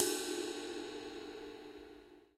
07a Ride Long Cymbals & Snares
cymbals, bronze, drum, crash, wenge, shot, hi-hat, drumset, cymbal, click, A-Custom, snare, bubinga, turkish, ride, custom, K-Custom, one-shot, one, metronome